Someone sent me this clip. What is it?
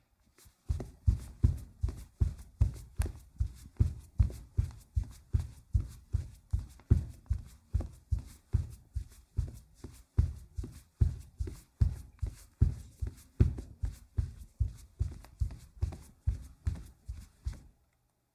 Footsteps, medium pace on wood floor with socks